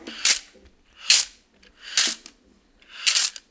essen mysounds chiara
abacus movements hard
Essen, germany, mysound, object